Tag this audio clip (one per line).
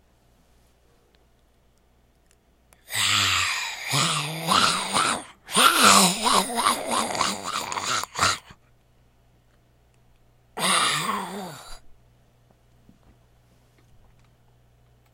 creepy
eerie
freaky
horror
monster
scary
sinister
sounds
spooky
suspense
terror
undead
zombie
zombie-sounds